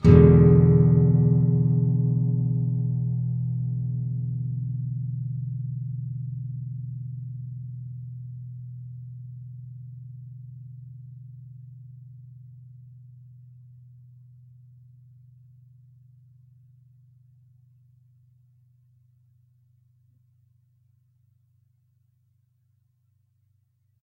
E7th thick strs
Standard open E 7th chord but the only strings played are the E (6th), A (5th), and D (4th). Down strum. If any of these samples have any errors or faults, please tell me.
7th, acoustic, chords, clean, guitar, nylon-guitar, open-chords